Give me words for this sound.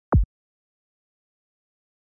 Good kick for techno, recorded with nepheton in Ableton.
virus, kick, effects